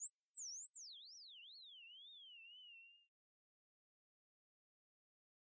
alotf shot fx wobble
fx shot from song
hop, soundeffect, sound-effect, sound-design, design, sounddesign, effect, hiphop, hip, fx, sound, hip-hop